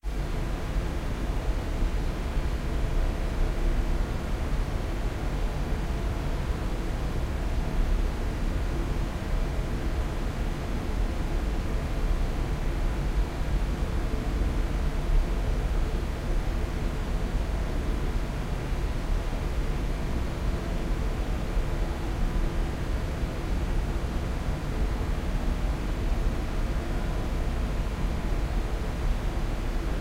This is an ambient sound made from brown noise. Used reverb to dampen the noise. Processed using Audacity. This is suitable for desert ambient, inside of a ship, empty airport, and other ambients you may hear. Have fun!
space, sci-fi, ambients, loop, effect